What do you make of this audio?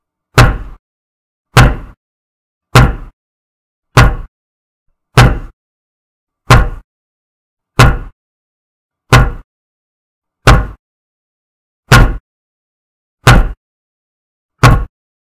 Hitting wood against floor
hitting a longboard against the floor